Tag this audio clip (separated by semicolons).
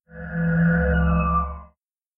futuristic; game; intro; mograph